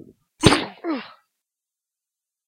Slam Down or Punch
slam, punch, slap, smash, crash, gun
down, gets, groans, slammed, Someone, then